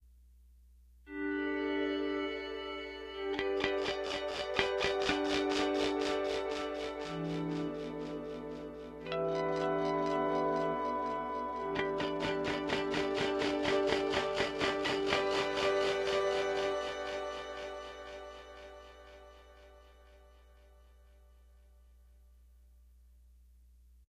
Heavily processed guitar harmonics processed through a DigiTech 2101 Artist Pro processor. Recorded late at night in Collingswood, NJ, USA. Can you tell?